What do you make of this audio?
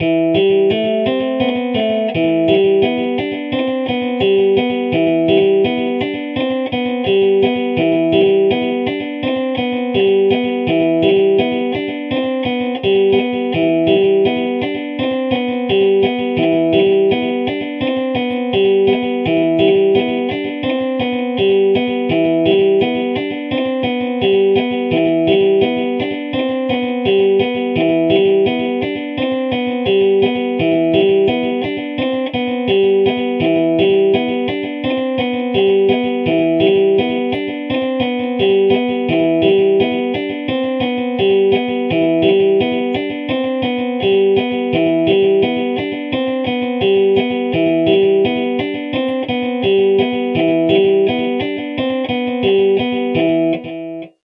guitar 2 - Only 1 amp and 1 echo
amp, amplifier, echo, effect, electric-guitar, gate